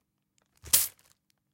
Coin Bag Pickup Drop
tempo, Fabric, Drop, heavy, fantasy, fast, medieval, metal, weight, Coin, rpg, role-playing, Gold, Purse, Bag, Pickup, Coins, Tokens, Sack, Token, Wallet, role-play, Money